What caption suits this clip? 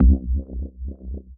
Neuro Bass by Sec.Lab